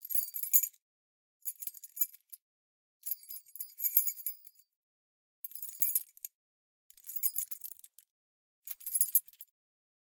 Foley Keys Movement Mono NTG4P
Keys movements - manipulation (x5).
Gear : Rode NTG4+
foley, h5, keys, manipulation, mono, movement, NTG4, rode, short, zoom